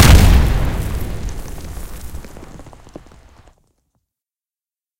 This is the same remix, but without the falling brick sounds, just the explosion and some subtle rubble in the background. A bass boost, low pass filter, and some other stuff, same as the other explosion I remixed.
bang, debris, exploding, explosion, rubble, war